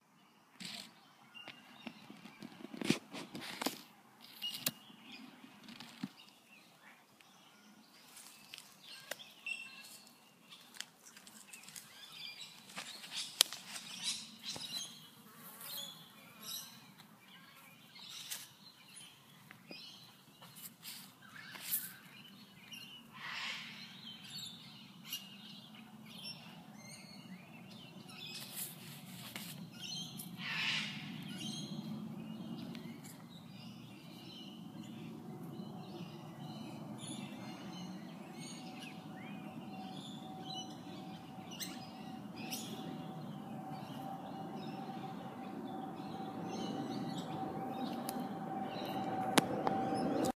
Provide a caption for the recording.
A sound of bird tweets and chirps.